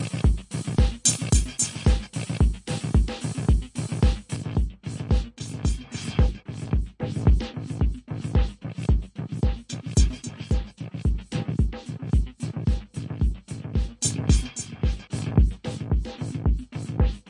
Only the built in filter was used.This sample is a rhythmic loop running through the Trance Gate pattern gate and built in filter with LFO. The filter was set to Low pass, with a fairly low resonance and LFO modulation.
vst, loop, lofi, drums, filter, test, gate, effect